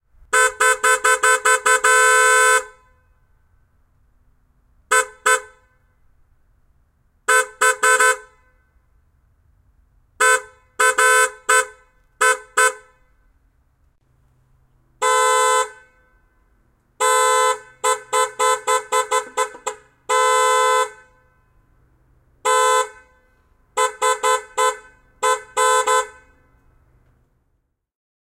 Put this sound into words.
Moto Guzzi 950 cm3. Äänimerkkejä ulkona vähän kauempana, erilaisia.
Paikka/Place: Suomi / Finland / Vihti
Aika/Date: 17.11.1991